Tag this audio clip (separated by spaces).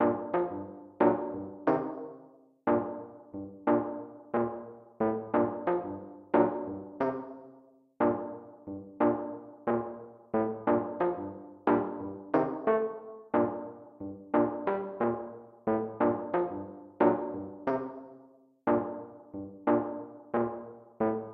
Distorted Classic Piano E Chords